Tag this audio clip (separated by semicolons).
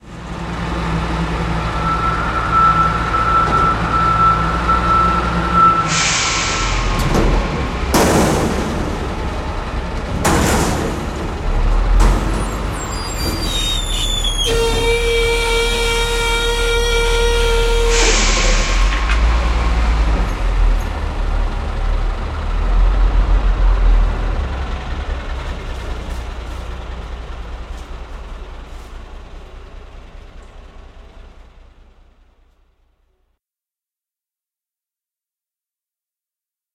crash; 252basics; dump; truck; garbage; collection; air-brake; bang; kidstuf; screech